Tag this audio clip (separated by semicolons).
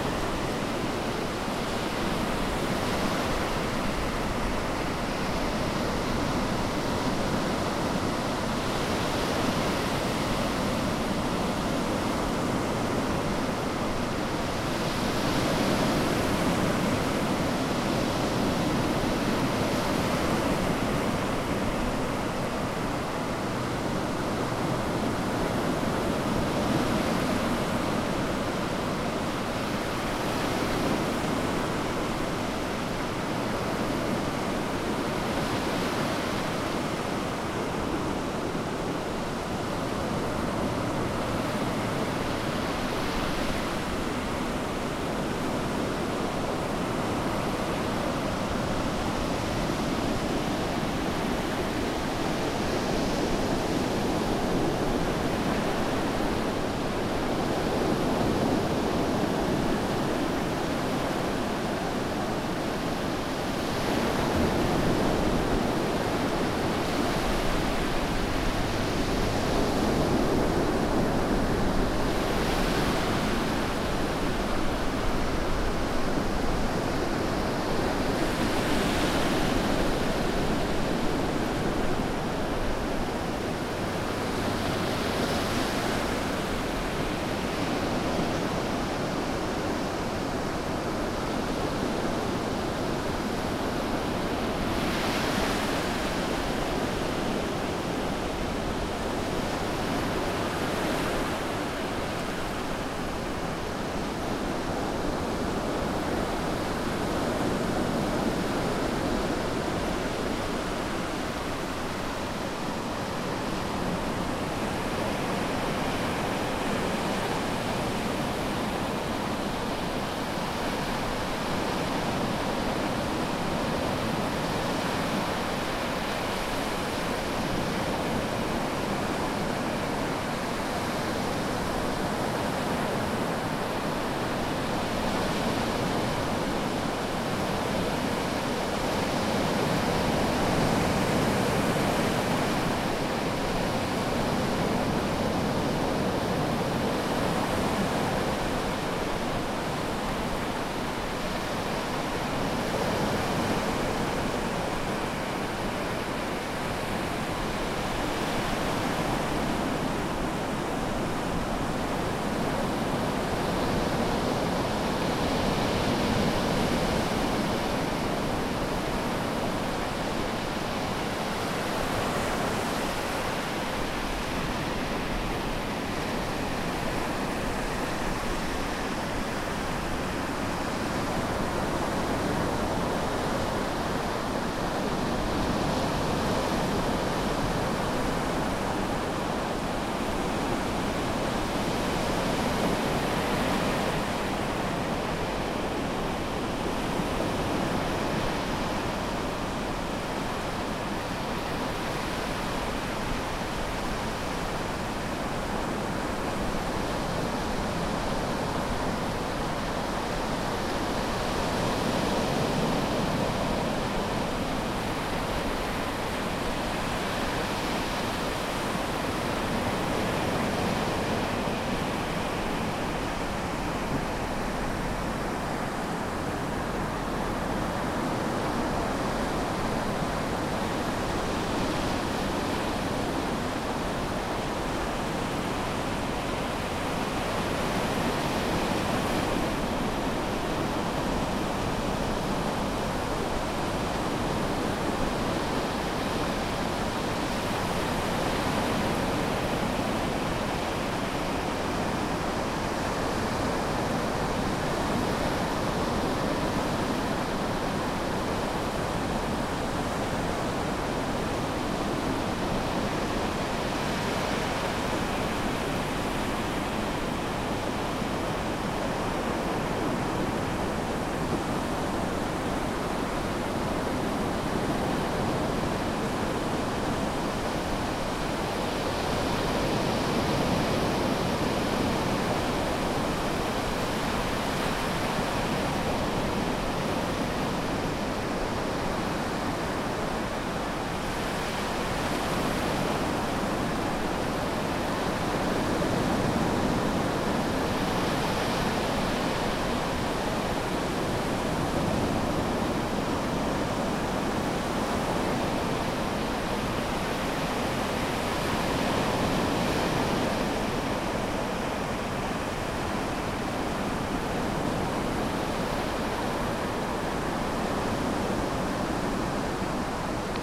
beach; field-recording; sea; waves